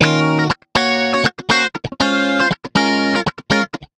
cl funky b1
Funky, rhythmic riff on stratocaster guitar. Recorded using Line6 Pod XT Live.
funky, funk, clean, guitar, rhythmic, riff